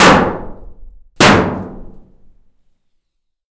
record in garage